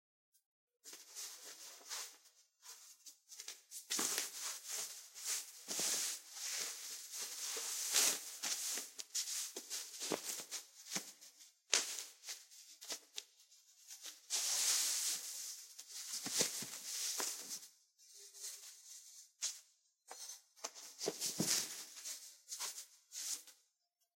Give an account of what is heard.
Dressing a cotton pullover.
pullover, clothes, movement, dressing, material, clothing